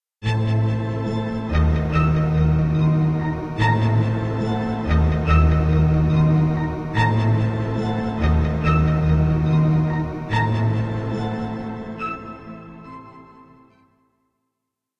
sci-fi6
made with vst instruments
soundscape, energy, machine, starship, hover, spaceship, dark, drone, emergency, drive, rumble, electronic, future, effect, sound-design, pad, futuristic, noise, bridge, ambient, space, sci-fi, background, Room, impulsion, engine, fx, ambience, deep, atmosphere